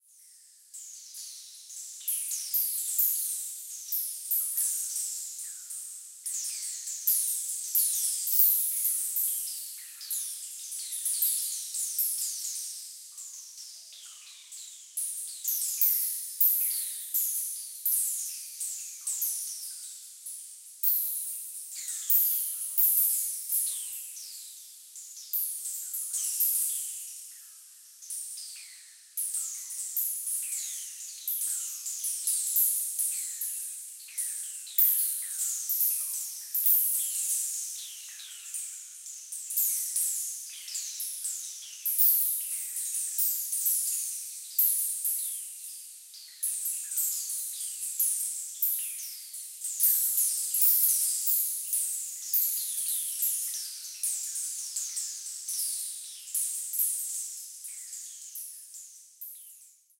This sample is part of the "Space Drone 3" sample pack. 1minute of pure ambient space drone. Jungle atmosphere, but form outer space.